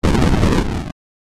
Fire - [Rpg] 1
shot, flame, fire, efx, sound-effect, rpg, sfx, sound-design, fx, ct, game-sfx, burn, free, game